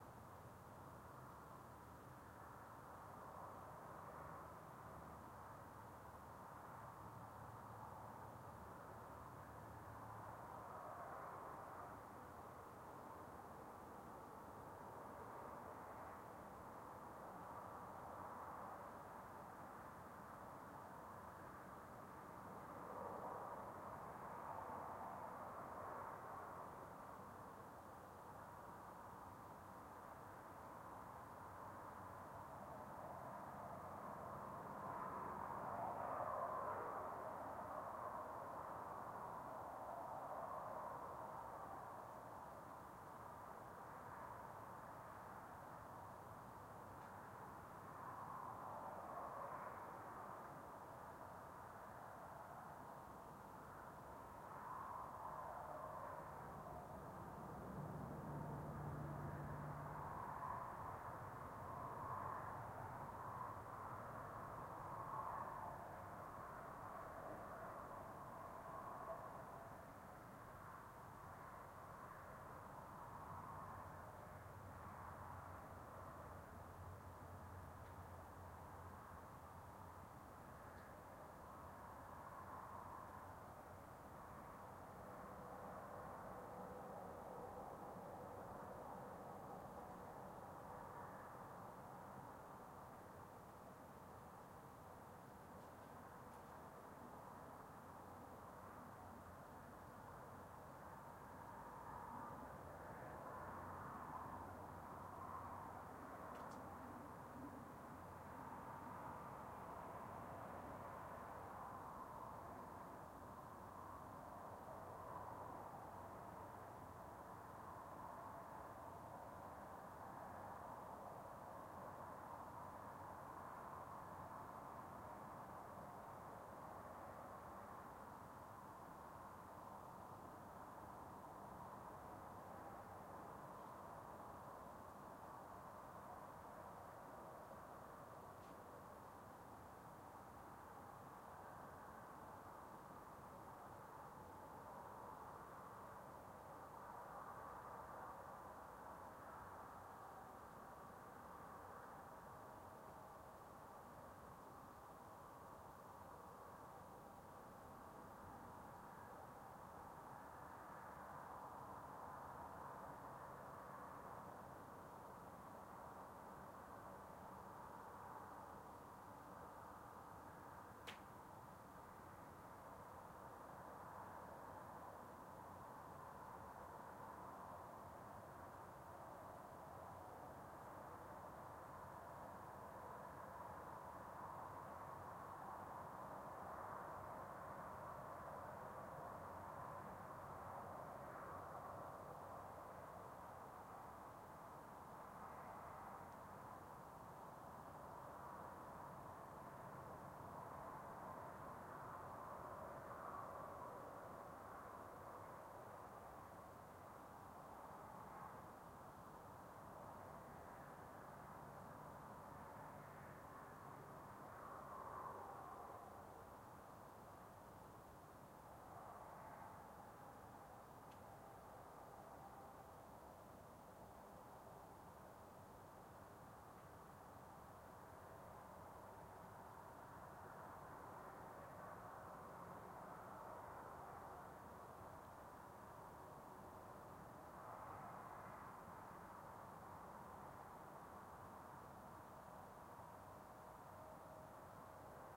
BG SaSc Autobahn Highway Background Far Germany
Autobahn Highway Background Far Germany